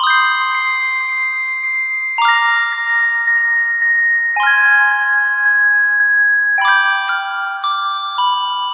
Part of the Beta loopset, a set of complementary synth loops. It is in the key of C minor, following the chord progression Cm Bb Fm G7. It is four bars long at 110bpm. It is normalized.